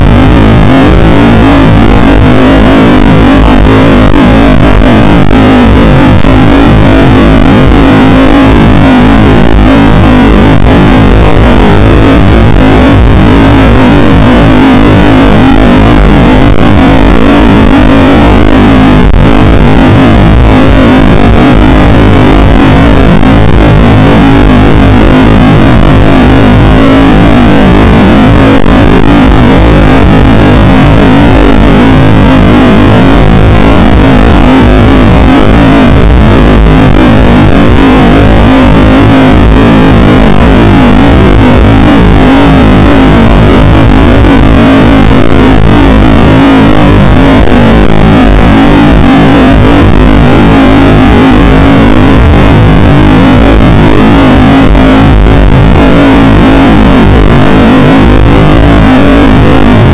made in Audacity weird sound